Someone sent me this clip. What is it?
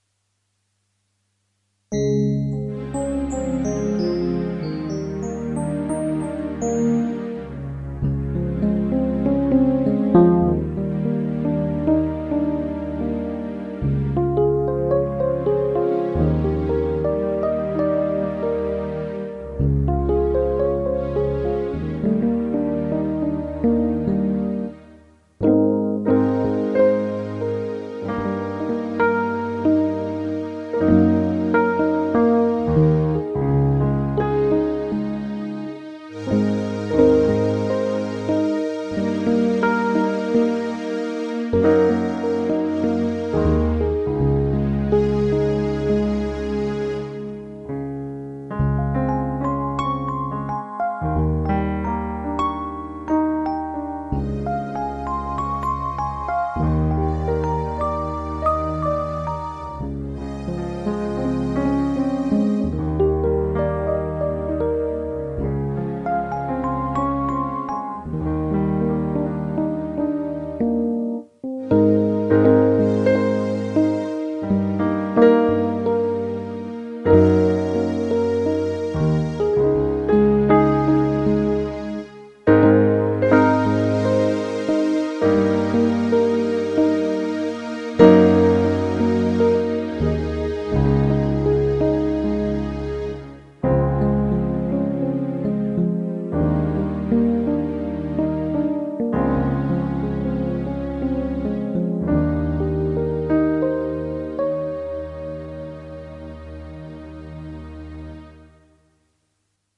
New growth
Soft repeating keyboard motif. Piano, vibe and chorus through Audacity. Ideal for romantic interlude or backing track.
Hope, track, Movie, background-sound